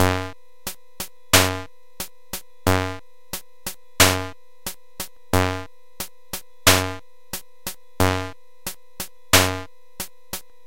This came from the cheapest looking keyboard I've ever seen, yet it had really good features for sampling, plus a mike in that makes for some really, really, really cool distortion.